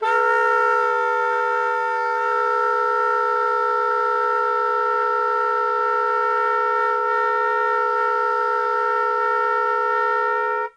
The number of file correspond to the numbering of the book:
Le sons multiples aux saxophones / Daniel Kientzy. - Paris : Editions Salabert,
[198?]. - (Salabert Enseignement : Nuovelles techniques instrumentales).
Setup:
sax, saxophone, multiphonics